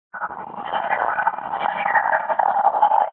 Spooky Alien Language 04
Sounds like an alien transmission from outer space. This is an example of digital signal processing since this was created from recordings of random household objects in a studio.
Sci-Fi, Language, Space, Voices, Transmission, Spooky, Alien, Outer